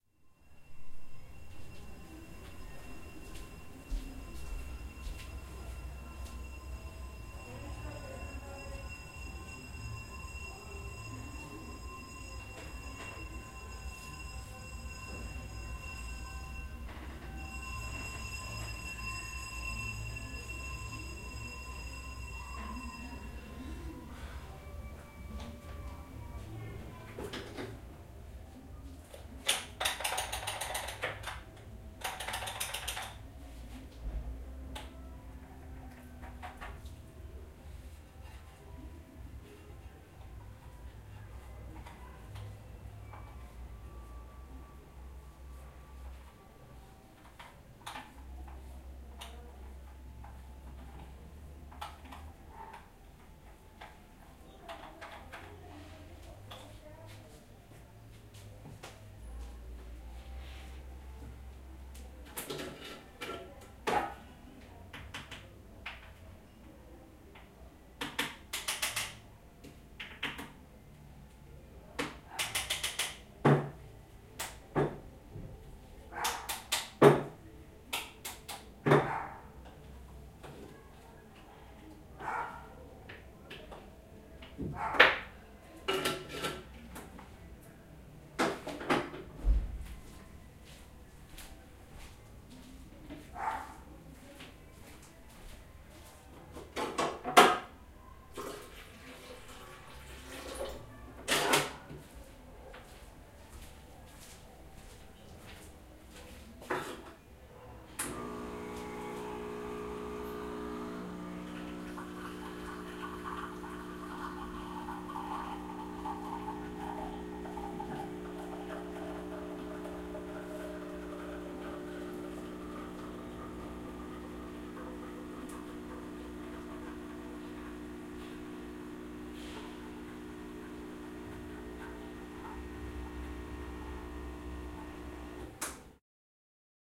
paisaje sonoro café 2 min
paisaje sonoro de una campana de la basura y mi máquina de café, hecho para proyecto colaborativo de TRAMAS, espacios de experimentación artística
te invitamos a ver el proyecto en el que se te invita a colaborar interviniendo estos paisajes